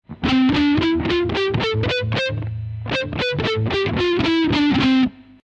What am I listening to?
Just the C Major scale mutted.